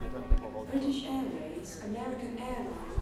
ZOOM0003 Heathrow announcement.
Heathrow airport announcement flight female voice
field-recording
female, Heathrow, airport, announcement